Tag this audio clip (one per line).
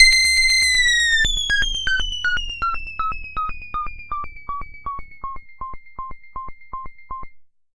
analog
synth